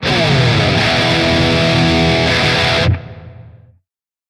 Distortion Guitar Power Chord E.